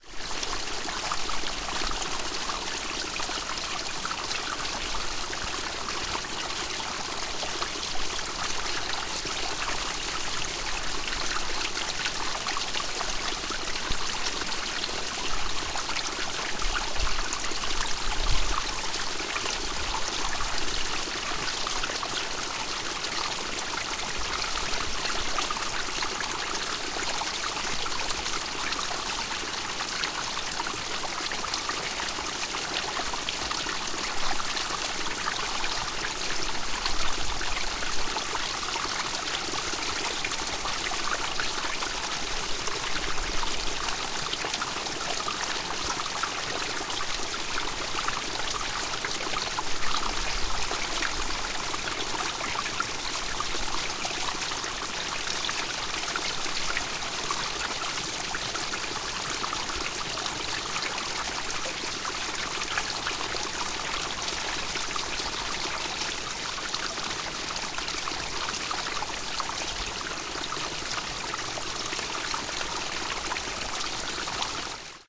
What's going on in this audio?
DR-100 0018 Water stream in Greenland
recorded in Nuuk, Greenland, outside the city. a big pile of snow melting on the mountain's side made this little stream of water.